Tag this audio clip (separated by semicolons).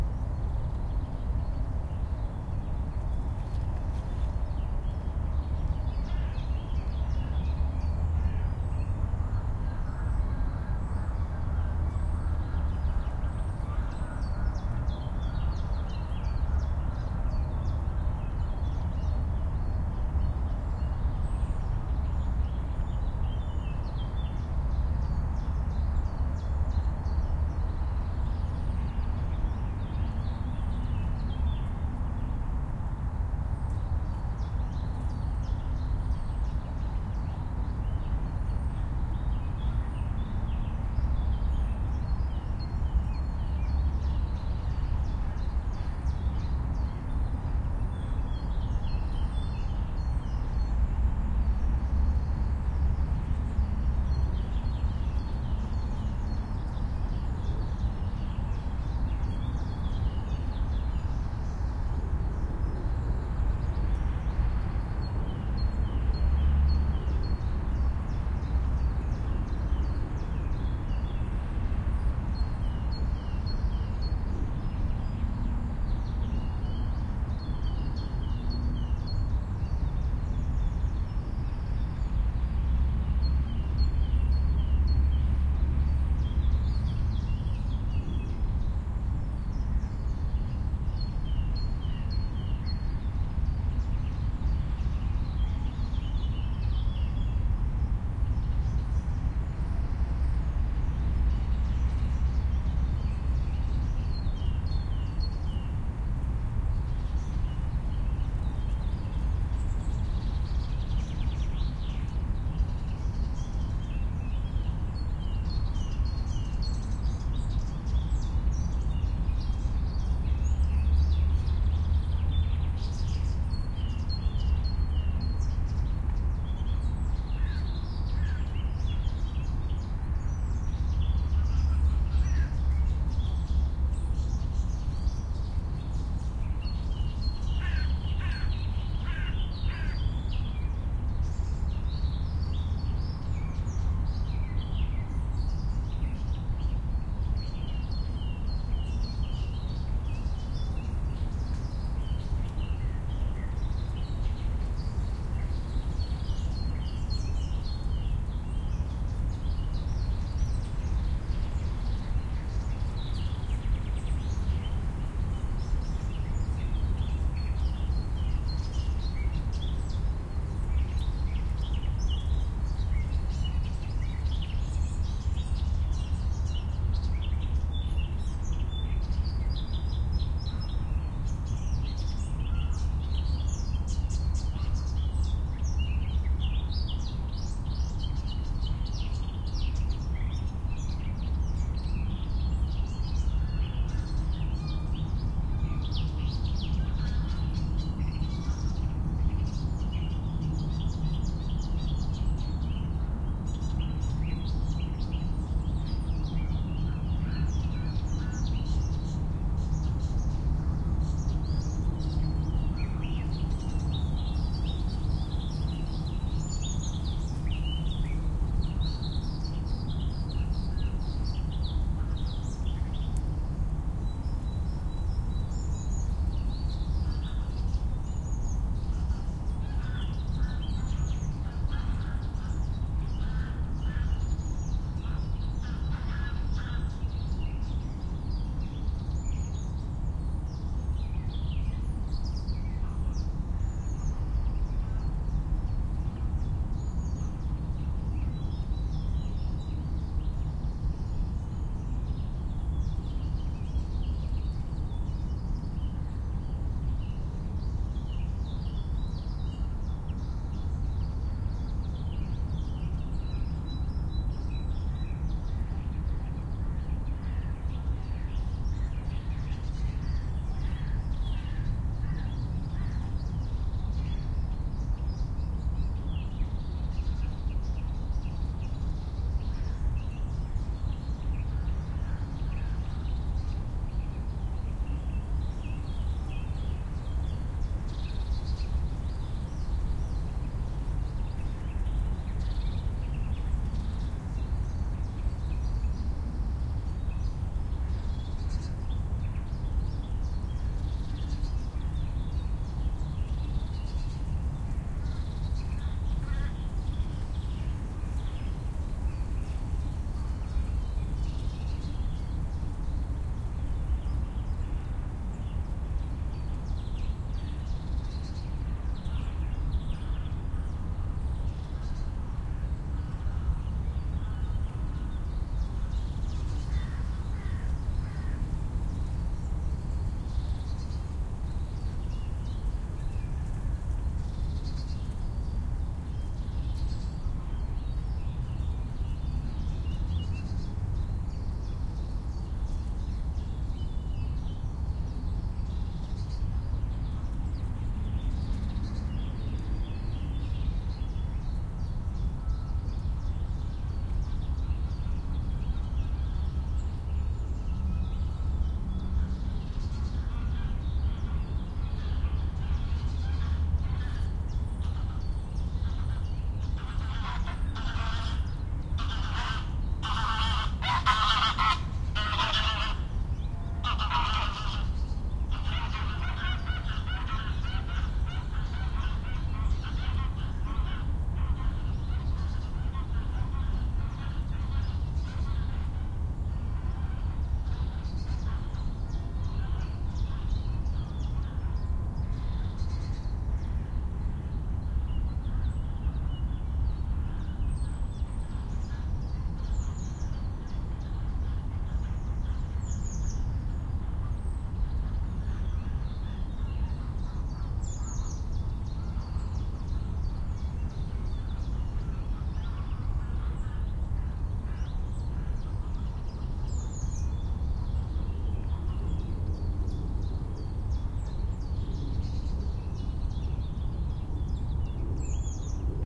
birds; birdsong; city; countryside; field-recording; morning; nature